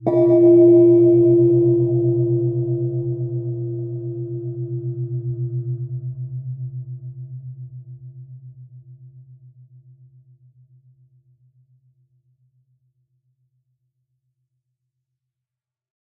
Wind Chime, Gamelan Gong, A
Audio of a gamelan gong, artificially created by pitching down the strike of a single wind chime with a metal mallet, with plenty of reverberation added.
An example of how you might credit is by putting this in the description/credits:
The sound was recorded using a "H1 Zoom recorder" and edited in Cubase with Kontakt 5 on 16th November 2017.